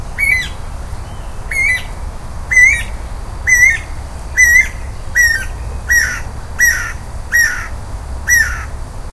guira cuckoo02
Song of a Guira Cuckoo, with a cricket in the background. Recorded with an Edirol R-09HR.